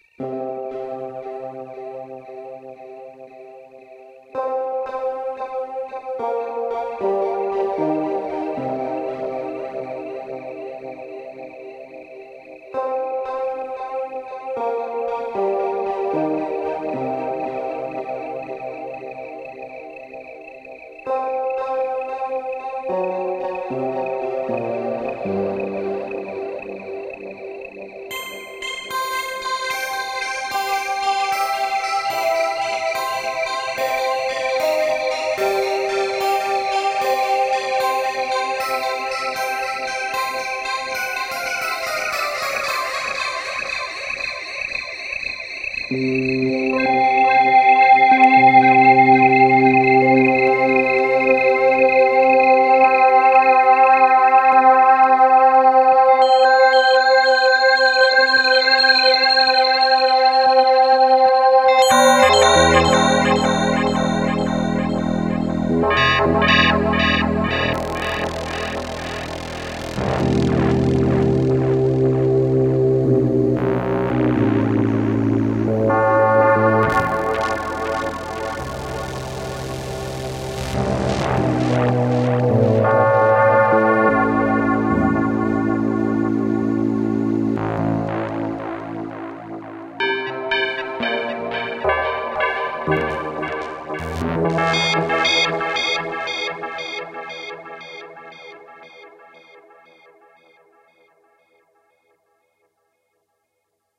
Yamaha PSS-370 with Effects - 08
Recordings of a Yamaha PSS-370 keyboard with built-in FM-synthesizer
FM-synthesizer, Keyboard, PSS-370, Yamaha